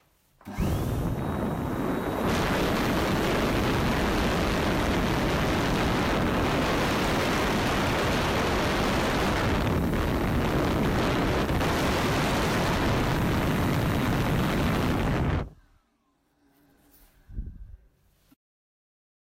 This is the record of a hand dryer in a bathroom switched on.